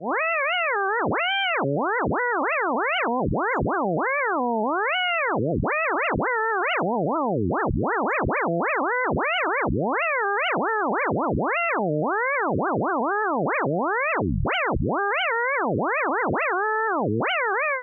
Kind of Do.Duck-Sounds, created with random pitches in SuperCollider.
duck, supercollider, electronic, processed, random